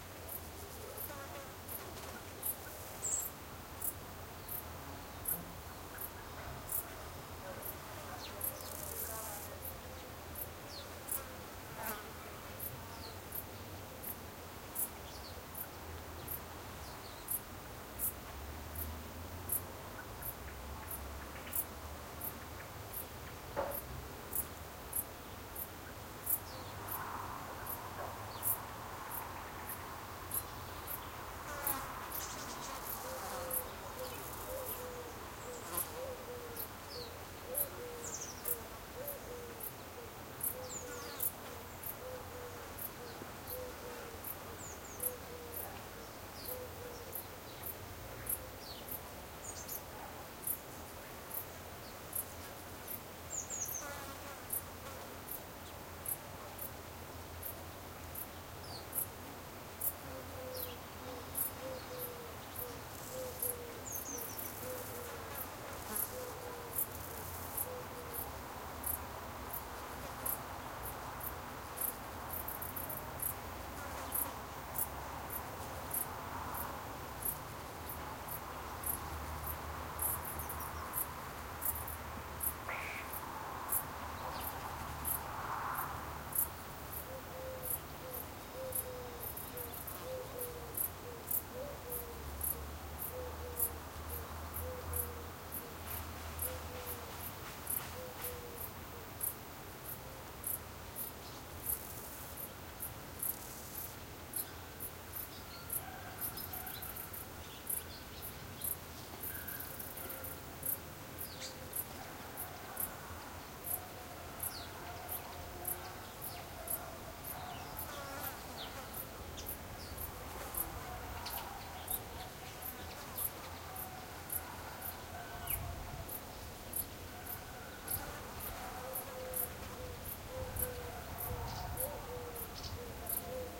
country village backyard crickets birds owl distant rooster steady light wind flies and traffic distant German voices
backyard, country, Germany, village